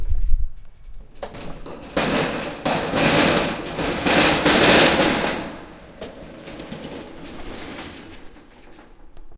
Large Metal Door Opening
Large metal door in theatre being opened
door, sound, metal, clang, sfx, unearthly, effect, impact, reverb, metallic, struck, design, opening